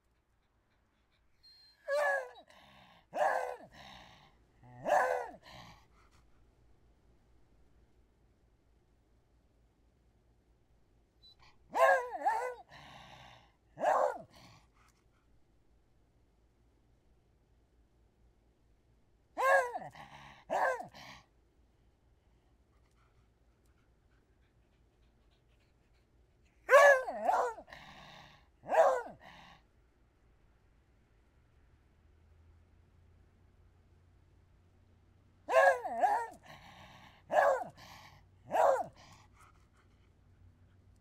Old beagle mutt barking and whining
beagle, dog, bark